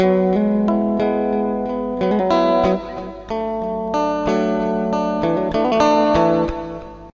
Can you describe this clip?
Ibanez guitar processed with Korg AX30G multieffect ('clean')
3-string riff, with hammers and pull-offs, fingerpicked
electric-guitar, musical-instruments